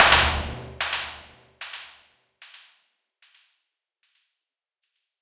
marblealcovesuper50scanyon
Impulse responses recorded while walking around downtown with a cap gun, a few party poppers, and the DS-40. Most have a clean (raw) version and a noise reduced version. Some have different edit versions.
convolution, impulse, ir, response, reverb